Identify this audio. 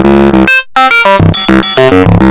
Some selfmade synth acid loops from the AN1-X Synthesizer of Yamaha. I used FM synthese for the creation of the loops.
sequence, synthesizer, acid